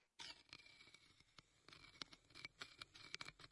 Queneau frot metal 11
prise de son de regle qui frotte
metal, metallic